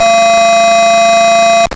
This sound is a monotron beep put through Saro (a free VST amp sim by antti @ smartelectronix).
The monotron is a very simple synth, does not have amplitude or filter envelopes. It can produce clicks on sound start and stop.
When that happens the Saro effect tends to emphasize the clicks. I have left them on, so you have the option of keeping them in or editing the sound to get rid of them.
monotron-duo, Saro, bleep, electronic